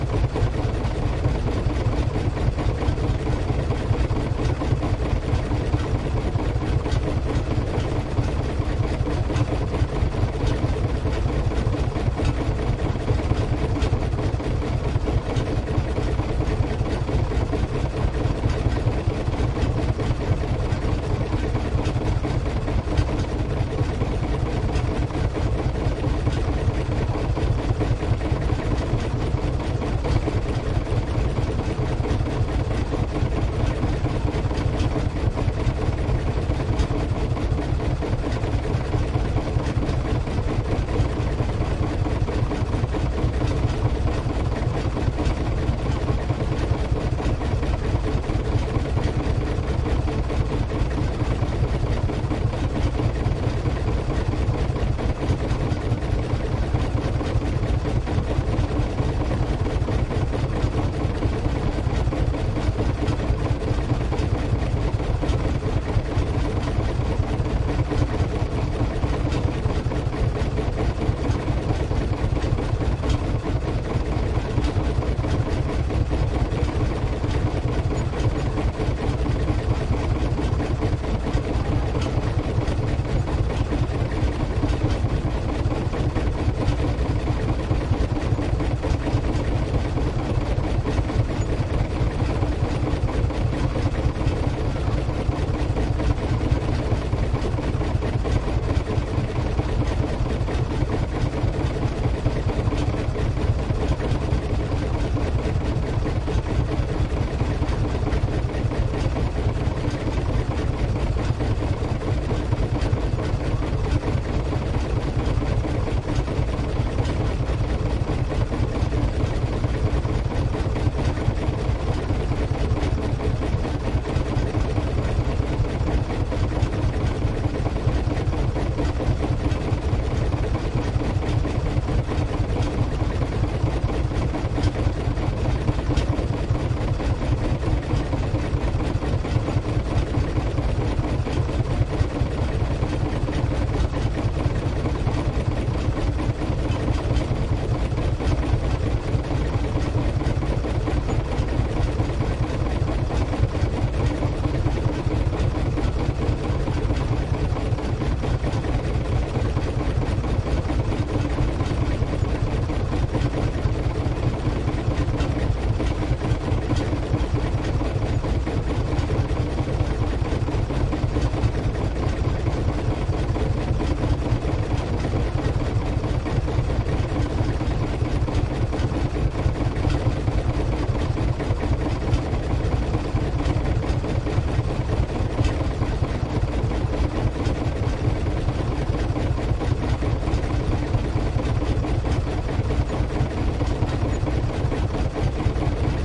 ambient Norway Myre harbour boat engines working close front perspective with people talking stereo ORTF 8040
This ambient sound effect was recorded with high quality sound equipment and comes from a sound library called Harbours Of Norway which is pack of 25 audio files with a total length of 167 minutes.